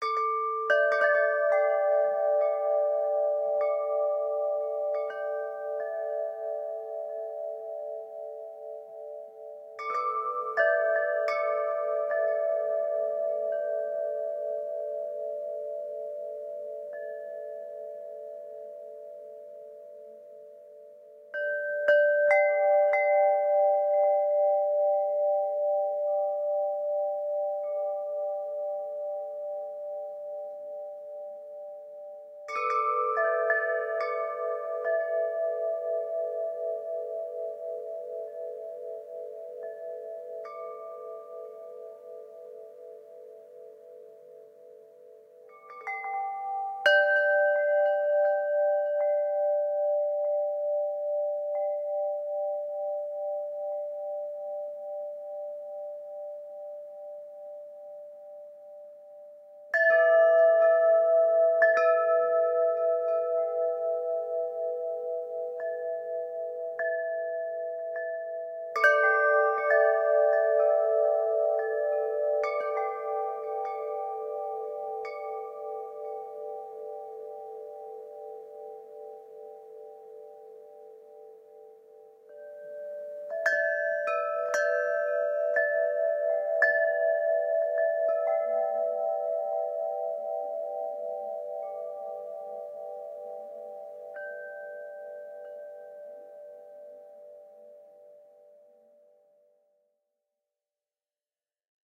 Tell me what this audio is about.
Wind Chimes (No Background Noise)
Another recording of the same wind chimes as previous. This time recorded in a quiet room to avoid the background noise. Enjoy!
windchimes, chimes, zen, meditate, peace, wind, gong, yoga